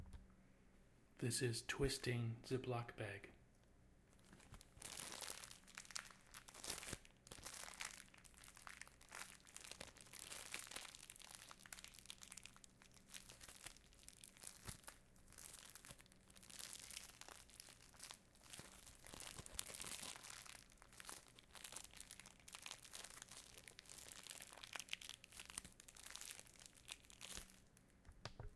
FOLEY Twisting Ziplock bag
What It Is:
Twisting Ziplock bag.
A spider spinning a web.
AudioDramaHub, bag, foley, plastic, spider, spin, twist, web